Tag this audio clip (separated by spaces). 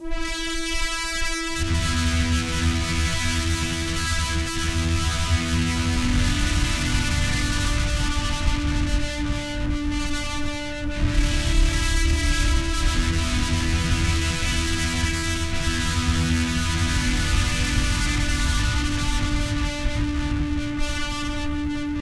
Keyboard electro atmosphere